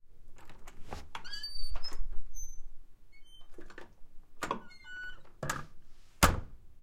Door Open Close Heavy Wooden Medium Creak Seal Theatre
A heavy wooden door for a theatre control room being opened and then closing on it's own at a regular pace
Door, Theatre, Medium, Closing, Squeak, Creaking, Wood, Heavy, Creak, Close, Wooden, Open, Shut